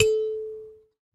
SanzAnais 69 A3 bz clo
a sanza (or kalimba) multisampled with tiny metallic pieces that produce buzzs
african, percussion, sanza, kalimba